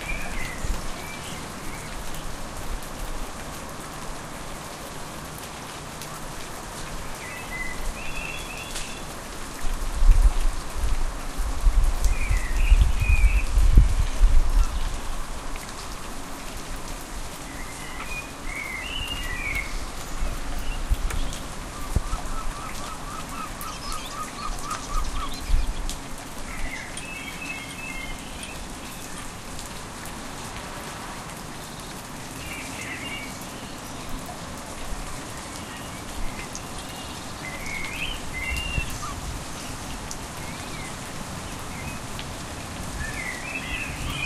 LIGHT RAIN AND BIRDS in scotland
recorded in dundee during a rainy day